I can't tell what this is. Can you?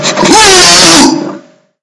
This is a very good quality scream! You can use this in a game if you want. :D
I created it with audacity.